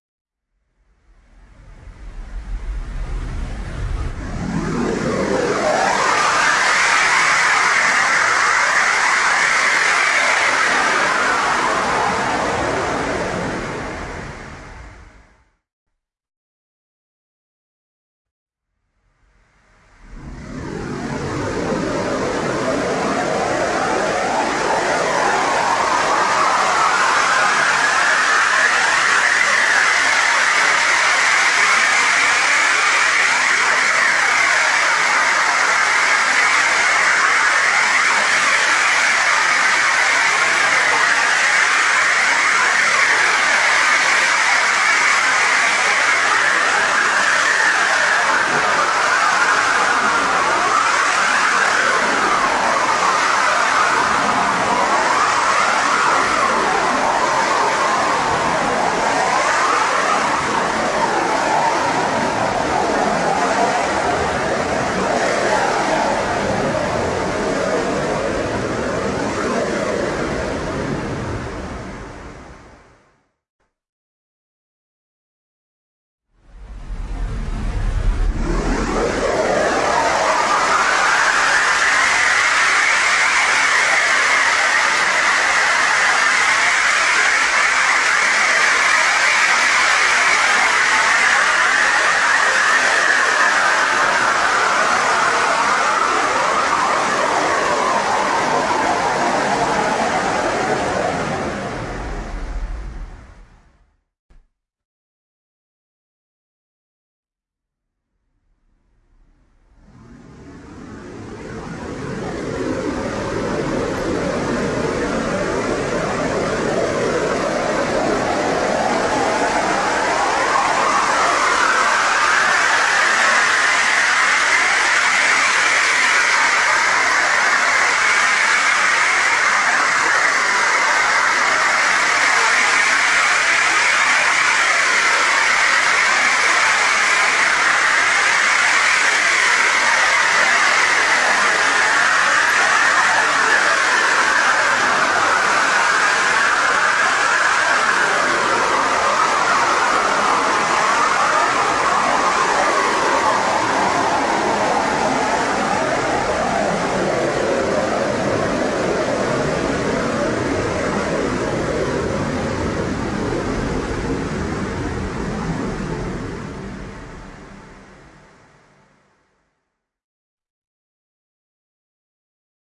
Contained herein are 4 separate effects of different lengths, all sounding very similar, created with the same Analog Box circuit, and post-edited equivalently in Cool Edit Pro. I was aiming for a cartoon-like sound for an object in flight for a considerable length of time. Like maybe it's a flying superhero. I dunno. But it could also be useful for something more serious, which is why I"m throwing it into my SciFi pack. It's not quite a whistle, more like bandpassed noise, but almost whistley, sort of.
You can cut off the start and end and just use the middle section of one of the segments if you don't like how they start and end. No matter what, you're going to have to edit this (at least to crop out the piece you want) since it contains 4 separate segments, so you might as well get creative while doing it.